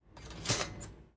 putting bread in toaster and pushing down
appliance, household, kitchen, toaster